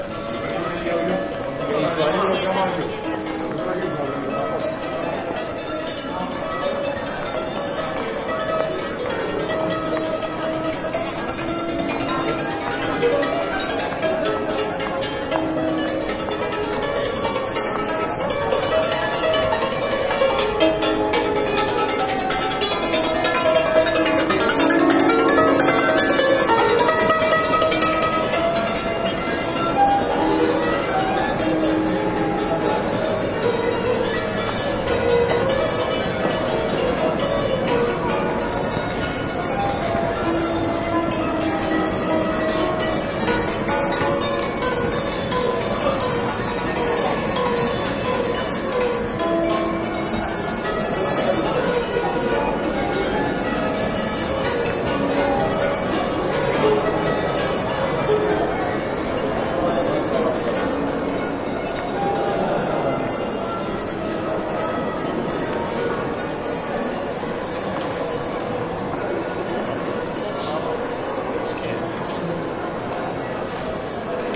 street ambiance near the Royal Galleries in Brussels, with voices and a musician playing a psaltery (sort of). Olympus LS10 internal mics
ambiance, brussels, field-recording, journey, street, travel